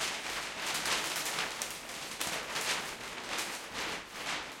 Queneau Papier kraft 01
manipulation de papier kraft devant micro ORTF
froissement, Papier